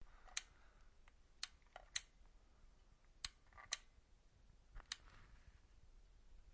Radio Switch Dead Battery
The sound of a Midland 75-785 40-Channel CB Radio turning on, no sound w dead battery.
CB, Midland, 75-785, Handheld, Radio